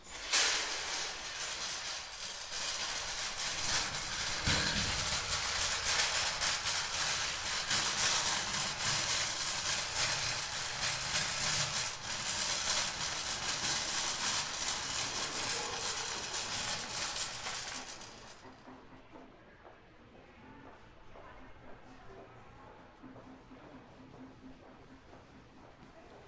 A short traverse through a store with a shopping cart. Wheels a rolling people a talking.